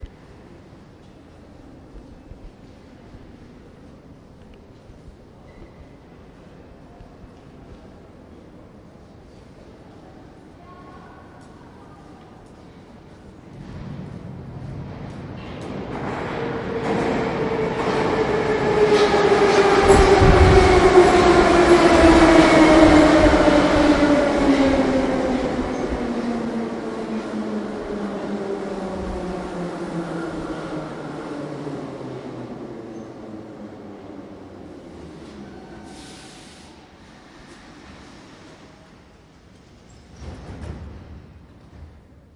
Moscow metro train arrives
train, Moscow, metro, arrives